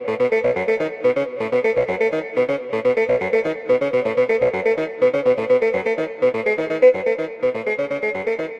Minibrute sequenced by Doepfer Dark Doepfer. Effects: EP Booster, T-Rex Alberta, Strymon Blue Sky.
Doepfer-Dark-Time, Minibrute